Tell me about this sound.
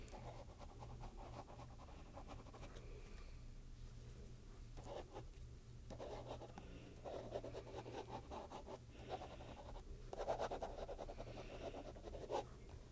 Writing something in a piece of paper with a pen/escrevendo algo numa folha com uma caneta
pen, paper, escrevendo, papel, caneta, escrever, writing